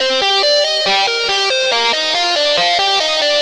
5th step...2nd melody possibly panned to the left or right.